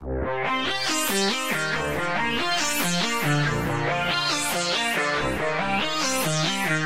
Celtic synth with bent note properties.
loop, synth, dance, progression, phase, club, hard, bass, trance, distorted, flange, 140-bpm, melody, techno, electronic, sequence, pad, beat